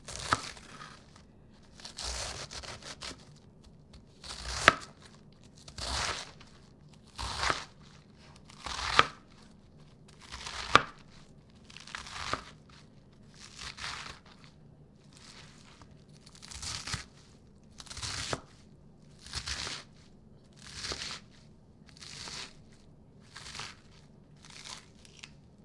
chopping-lettuce
The sound was recorded when a butcher knife was chopping a head of lettuce on a cutting board.